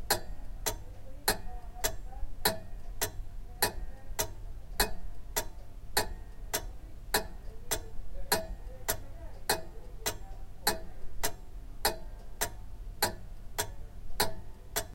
An old grandfather clock is ticking. Unfortunately some background noise, but I think that can be washed out easily with a noisegate or something.
clock, grandfatherclock, grandfather